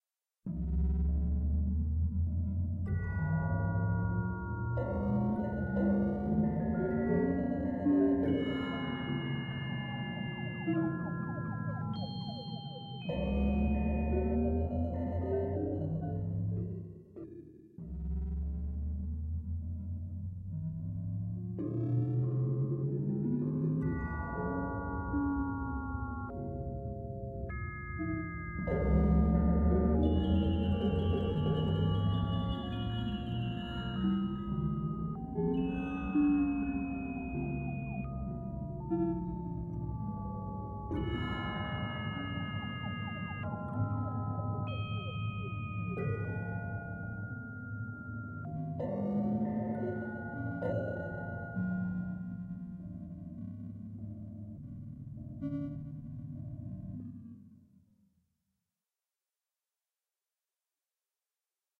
Underground Scare Em
An eerie sound created and played over an E minor chord. Created on a MIDI guitar in my home studio.
em, film, guitar, halloween, horror, midi, nightmare, scary, tribulas